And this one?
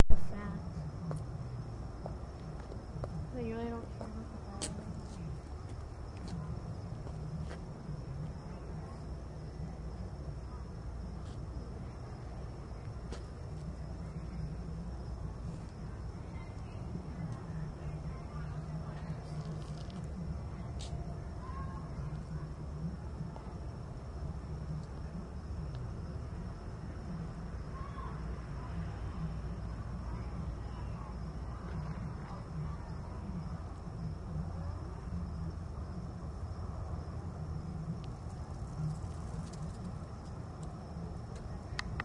A party in the distance and fireworks and firecrackers recorded with Olympus DS-40 and unedited except to convert them to uploadable format.